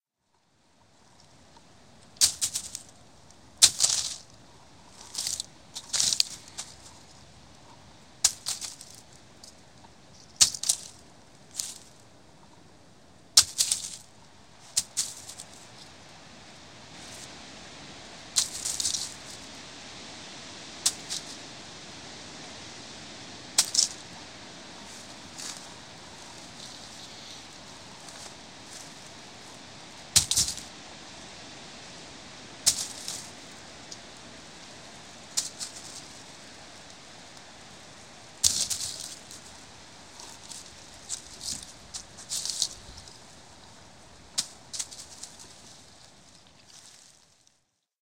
Pine cones dropping and landing on a bed of pine needles. This recording was made on a windy day, so there is some background "whooshing" wind; all in all, I think these pine cones softly landing on the needles can be put to good use.
Recording made with my Zoom H4N recorder, with a Rode NTG-2 shotgun microphone. For this recording I literally wanted to get as close as I could, so I placed the shotgun microphone on the ground and carefully let the pine cones fall as close to the microphone as possible, but without hitting it. *Not easy to do. I did edit out some of the direct hits!
Enjoy, and if you ever get the chance to spend a warm afternoon in the peace of a thick stand of pines, you should.